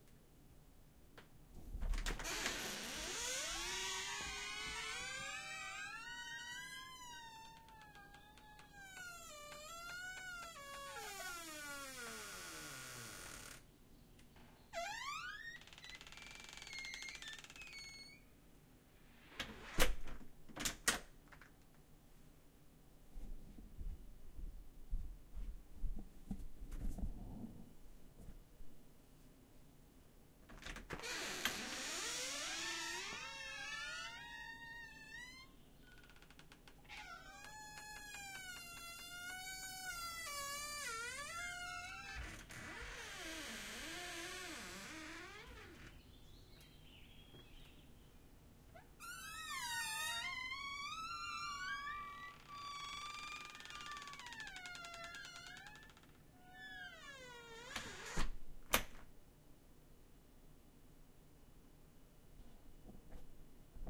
Squeaking Door Sound for Halloween
Old door Sound from a house in Iowa! I hope you can use this sound effect for your project!
Recorded with the Zoom H6 in a house somewhere in Iowa!
squeek, door, wooden, creaking, squeak, closing, squeaky, horror, cacophonous, hinges, halloween, open, creaky, squeaking, opening, close, sound, creak, clunk, handle, wood, gate